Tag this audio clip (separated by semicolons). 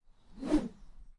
swish; whoosh; swing; swinging; woosh; whooshing; bamboo